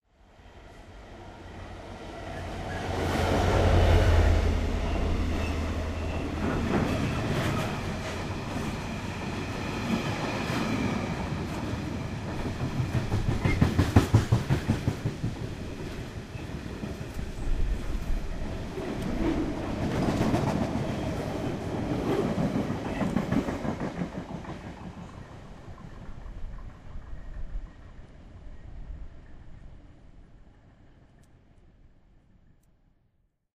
Train Passing Station Platform
Recorded at Hither Green Station, London, UK
clatter, electric-train, junction, locomotive, pass, passenger-train, rail-road, railway, station, train, trains, vibrations